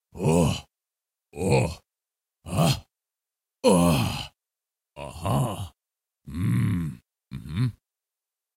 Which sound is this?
Strong Man Surprised
You gave a strong man a sweet little kitten. Finally, he was hungry.
male, deep, man, voice